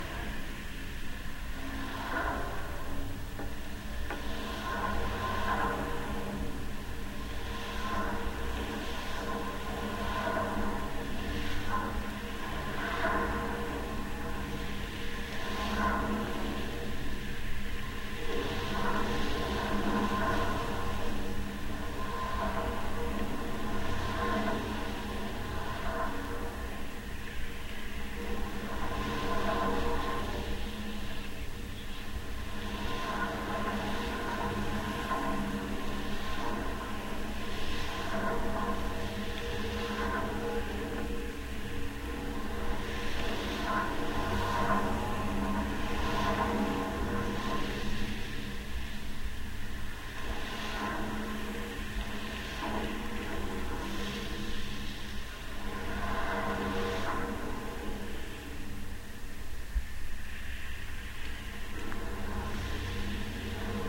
GGB suspender SE60SW
Contact mic recording of the Golden Gate Bridge in San Francisco, CA, USA at southeast suspender cluster #60. Recorded December 18, 2008 using a Sony PCM-D50 recorder with hand-held Fishman V100 piezo pickup and violin bridge.
wikiGong, contact, Fishman